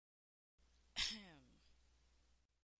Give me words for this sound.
Me saying "ahem" in my mic.
awkward, cough, female, girl, rude, sound, voice